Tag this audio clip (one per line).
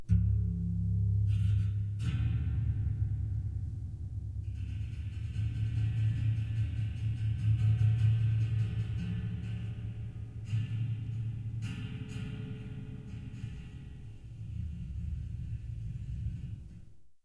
metal
bass
drone
scrape